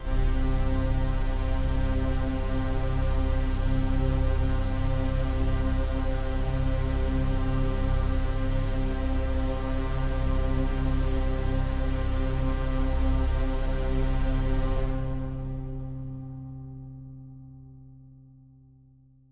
Sound Track Pad
Created by layering strings, effects or samples. Attempted to use only C notes when layering. A buzzy pad with strings and bass.